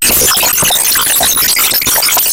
Beautiful glitch obtained by playing a video file forced into audio stream..